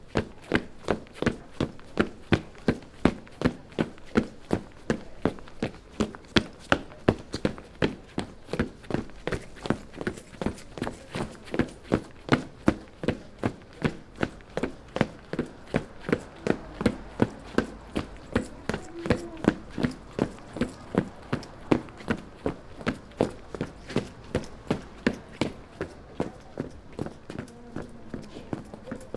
1 man running on pavement
pavement, footsteps